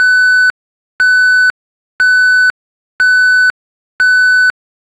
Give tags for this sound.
alarm
artificial
beep
beeping
computer
electronic
tone

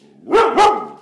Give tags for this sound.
big
dog
husky
shepperd
woof

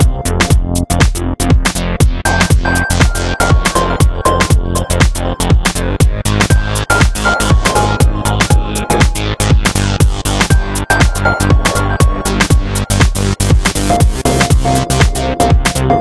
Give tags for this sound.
120bpm
dance
synthwave
rawsynth
uptempo
F
minor
edm
polyrhythm

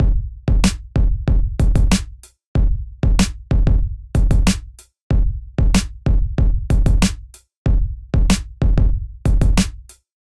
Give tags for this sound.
music
rap
beat
drum
decent
Hip-Hop
kick

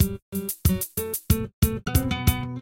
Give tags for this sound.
idm
processed
experimental
drums
electro
hardcore
sliced
acid
breakbeat
electronica
glitch
drumloops
rythms
extreme

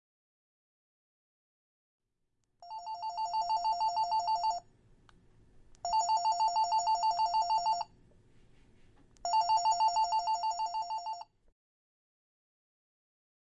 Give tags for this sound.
CZ
office
Panska
sounds
Czech

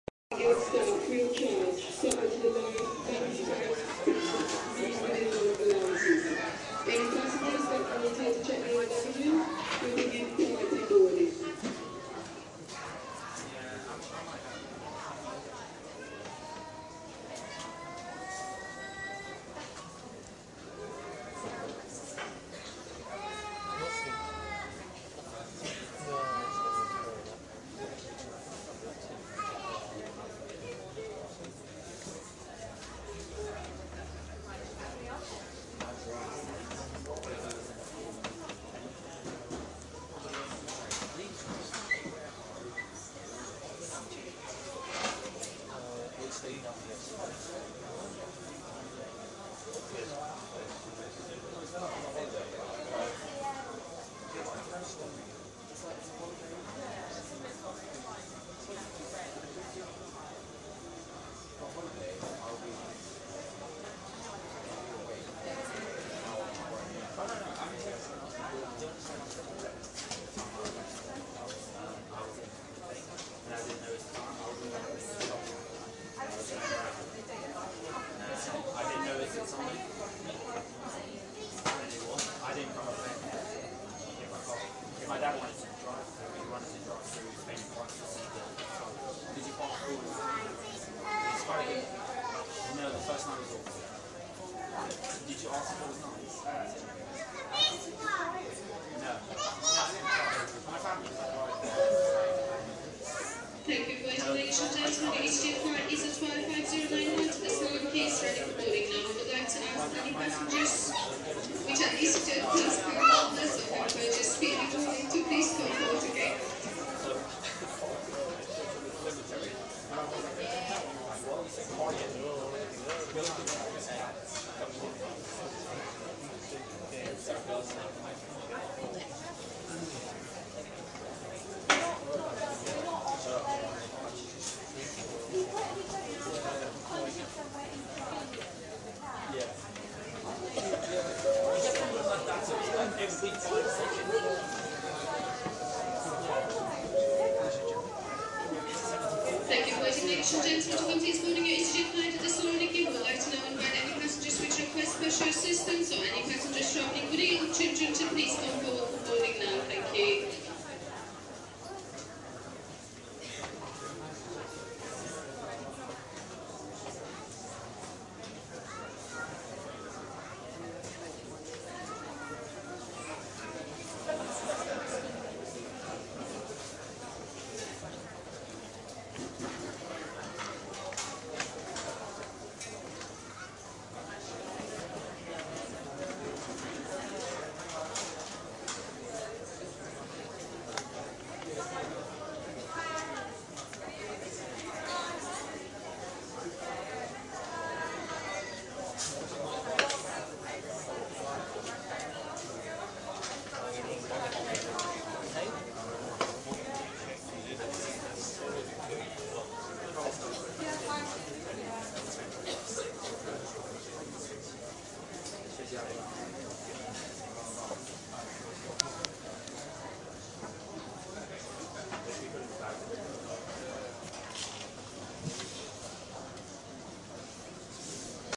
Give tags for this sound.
announcement
baa
call
calls
egll
gatwick
london

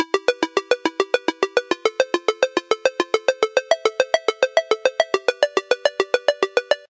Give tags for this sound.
mills
alert
3
mojo-mills

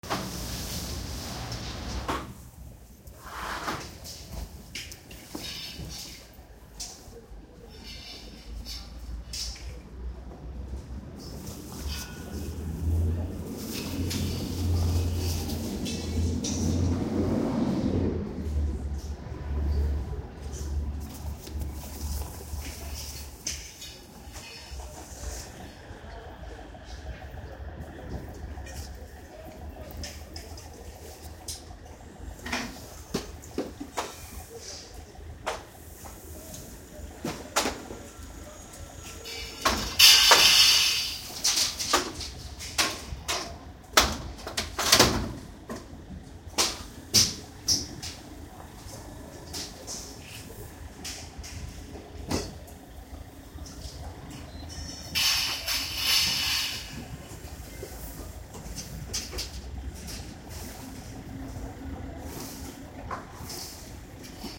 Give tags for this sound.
Bologna
field-recording
h24
outdoor
soundscape
VIIIagosto

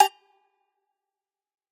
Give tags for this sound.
Abstract
Agogo
Percussion
Oneshot

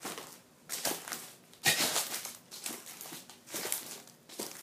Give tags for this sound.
pasos
hojas
efecto

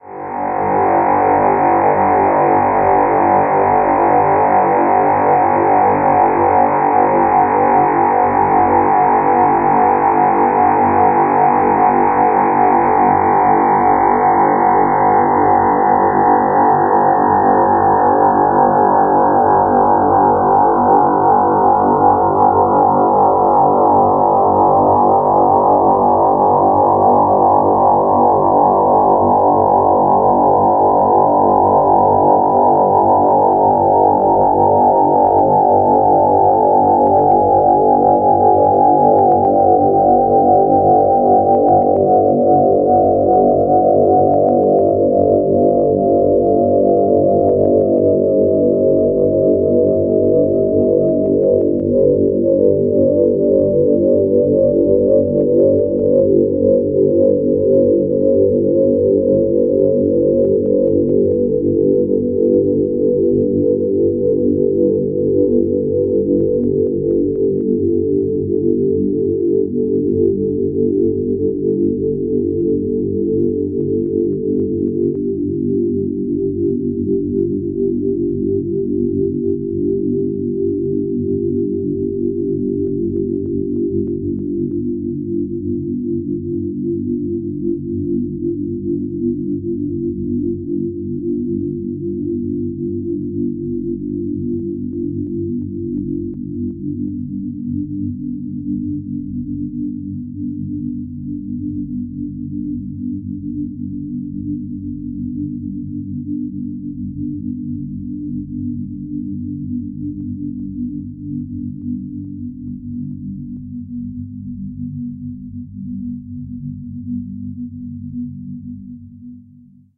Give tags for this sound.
sonification
dark
dare-22
drone
img2snd

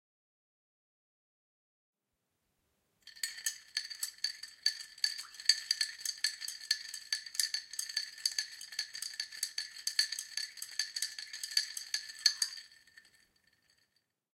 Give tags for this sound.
cz
panska
czech
bar
club

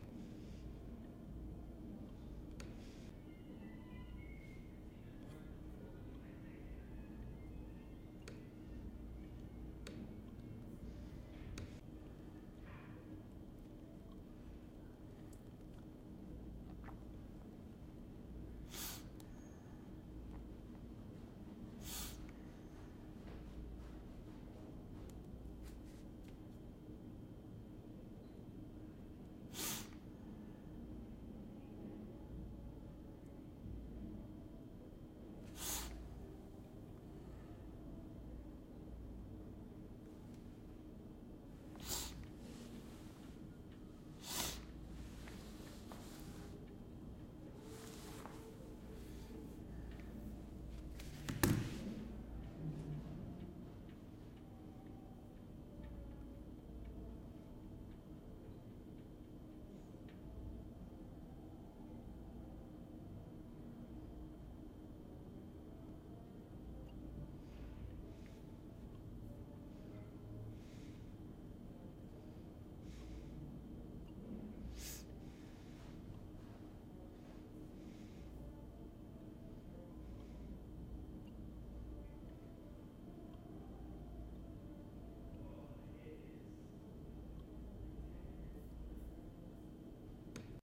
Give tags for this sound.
bad
sound
Scrathch
Microphone

